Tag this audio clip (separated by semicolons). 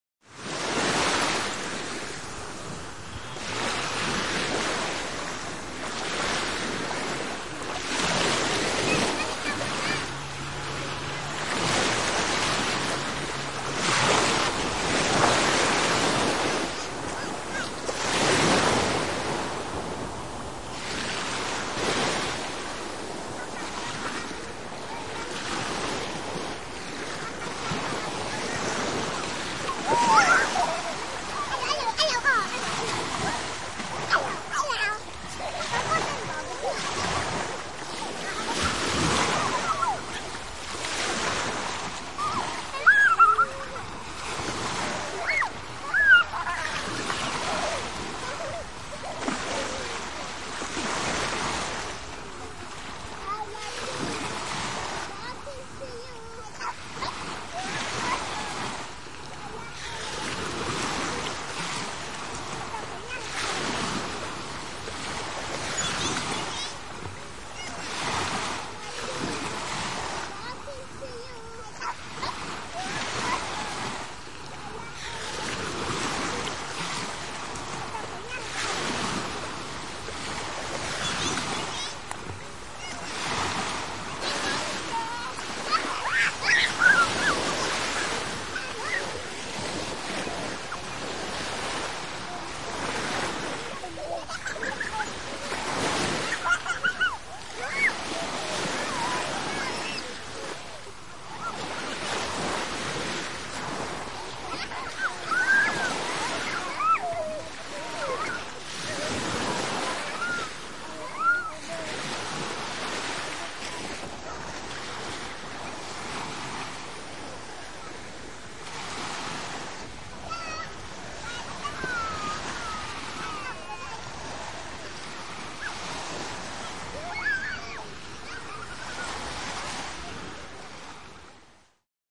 Hiekkaranta; Yleisradio; Ranta; Sea; Aallot; Field-recording; Children; Seashore; Tehosteet; Yle; Meri; Aasia; Beach; Vesi; Finnish-Broadcasting-Company; Lapset; Puhe; Asia; Waves; Soundfx; Water